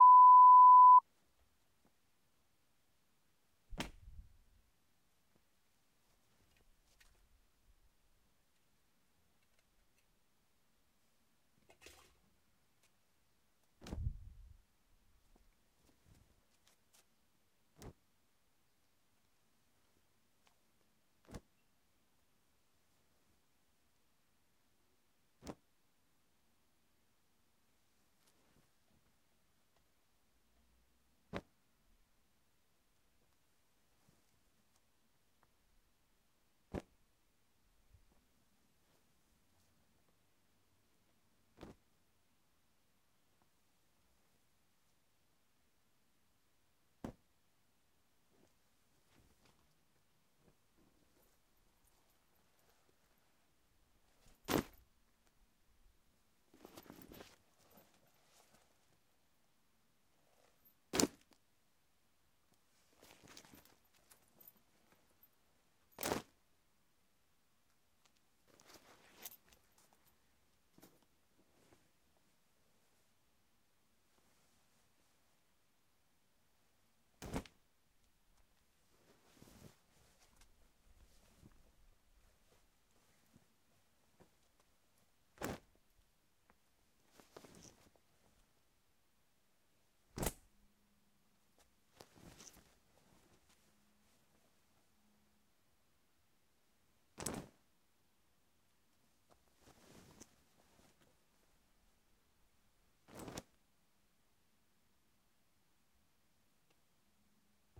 Clothes dropped (Hoodie, Leather Jacket, Large Coat)
A range of clothes dropped from a hight onto a hard concrete surface
Clothes, Coat, Dropped, Hoodie, Jacket, Jumper, Leather